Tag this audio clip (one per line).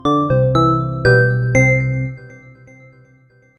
effect
intros
bleep
clicks
intro